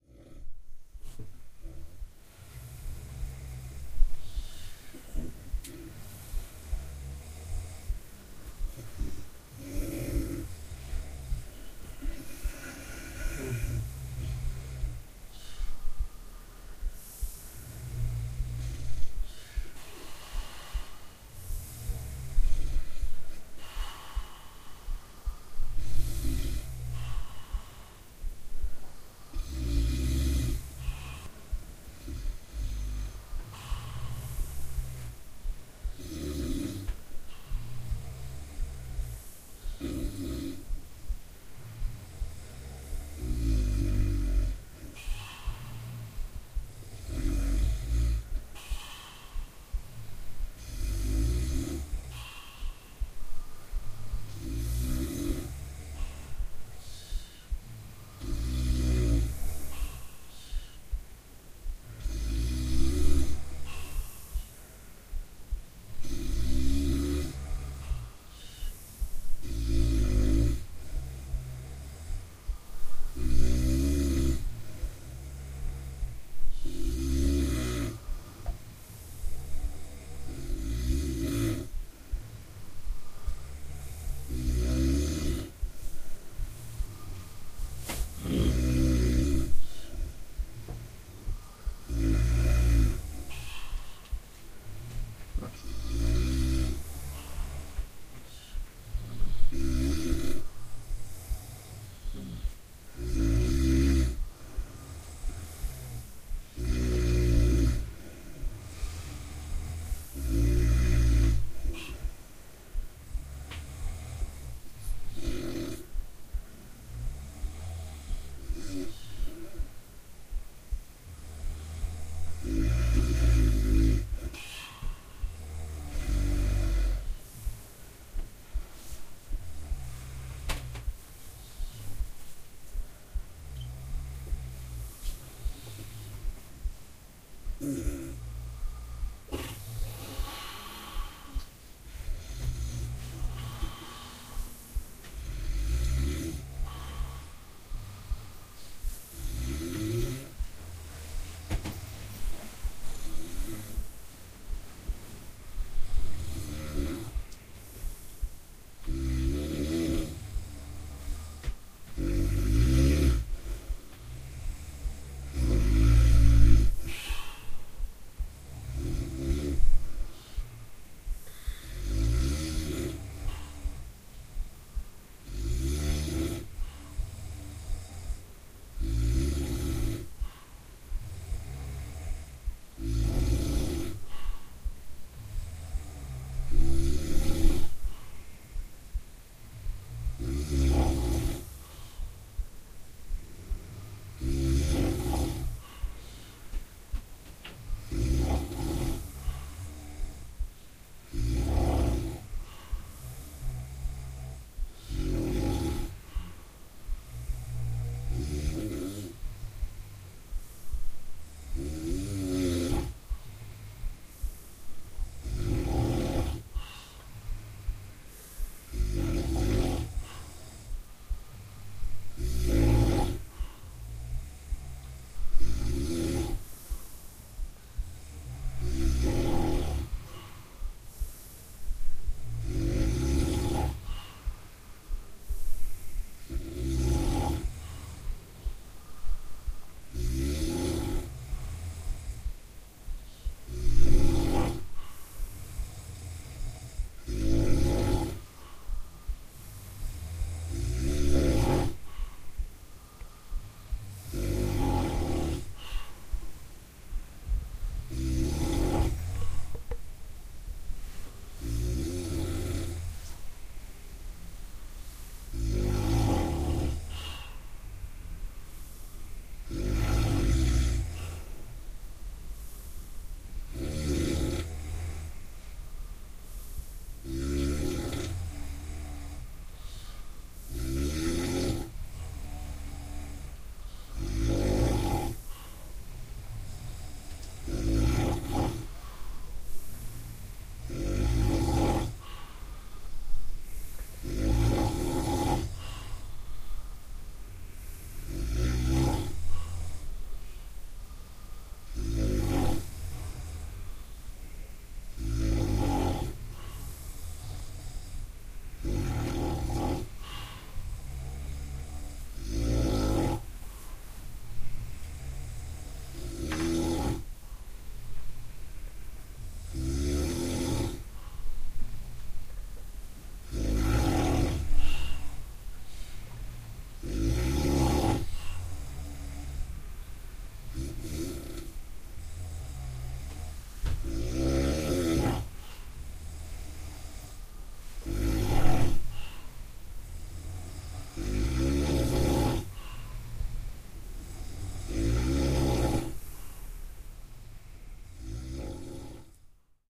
3/8/2011 - Fifth day
Night ambience in Albergue Acuario (Santiago de Compostela, Galicia, Spain). This sound (and the next one, Snoring Symphony part 3) are the second and third movements of the Snoring symphony of Camino de Santiago (and we would say one of the most representative sounds of the Camino).
This final part features much more calmed snores with a lot of breath (air).
The recording was made with a Zoom H4n. Like the recording of the first snoring symphony, there is a lot of background noise due to the low mic-recording level. We really need to get better in recording snoring symphonies!